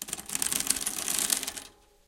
Typing on keyboard
random, taps, thumps, hits, scrapes, brush, objects, variable